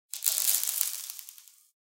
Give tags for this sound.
agaxly
cave
crumble
dirt
dust
gravel
litter
scatter

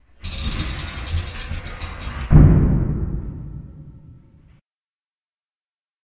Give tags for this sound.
close
closing
door
gate
lock
Prison
sliding

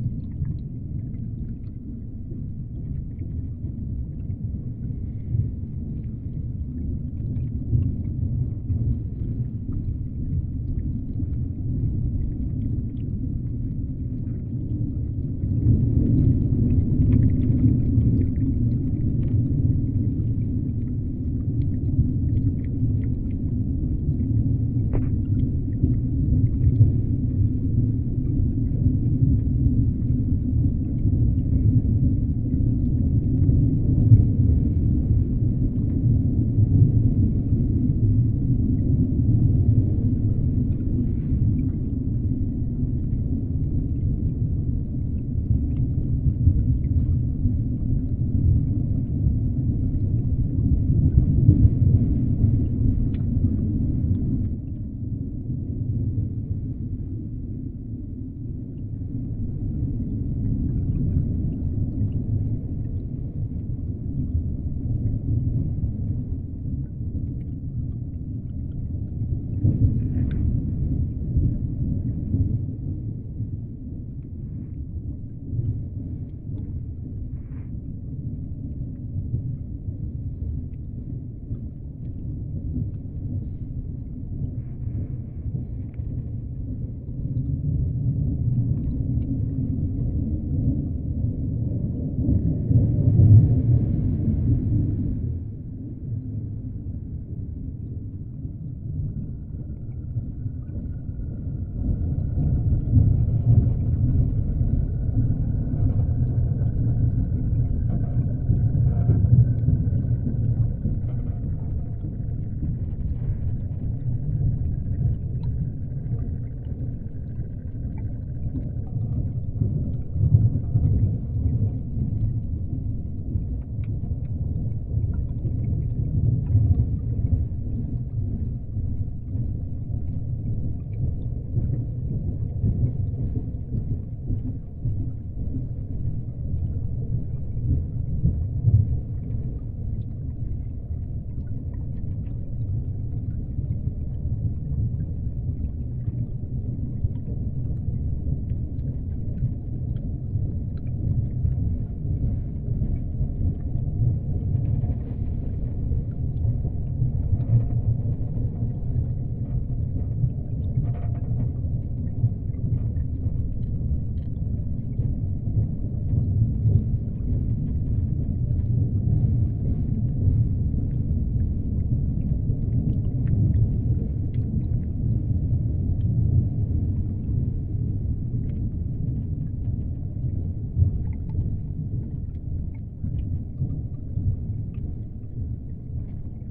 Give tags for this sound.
contact-mic
underwater

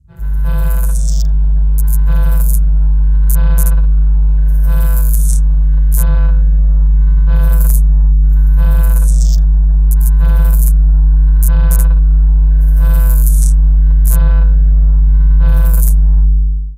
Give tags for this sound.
sci-fi
electricity
mysterious

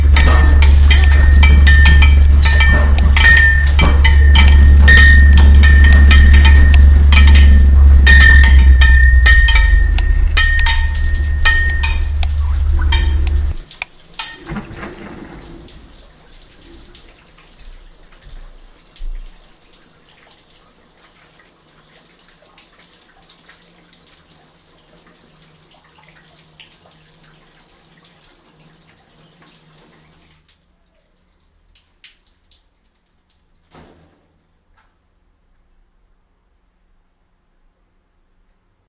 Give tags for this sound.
iron
steel
blacksmith
hammer
metallic
water
shield
ting

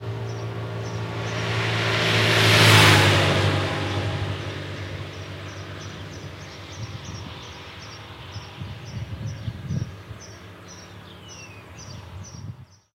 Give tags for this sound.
field-recording
motorcycle
passing
purist
road
traffic

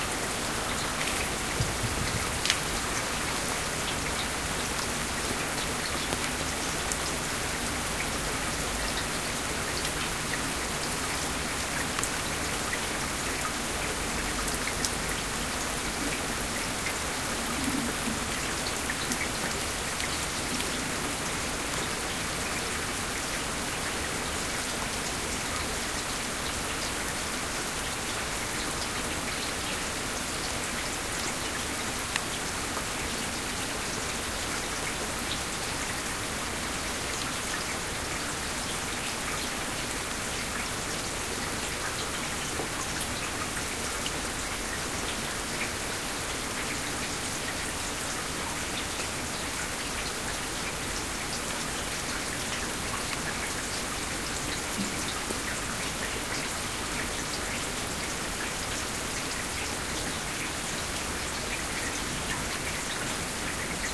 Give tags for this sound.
ambient
field-recording
rain
trickle
water
weather

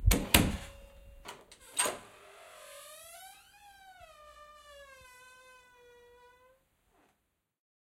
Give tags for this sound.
closing
cupboard
design
domestic
door
effect
foley
handheld
lock
recorder
recording
shut
slam
sound
Zoom

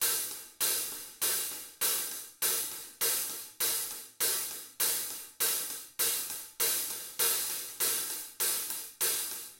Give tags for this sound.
acoustic
drums

